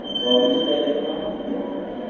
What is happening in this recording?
p1 16 fluit aankondiging
Sound belongs to a sample pack of several human produced sounds that I mixed into a "song".
mixing-humans, mixinghumans, sound-painting